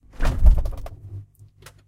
refrigerator door open (from interior)
A refrigerator door opening from the interior.
Recorded with a Zoom H1 Handy Recorder.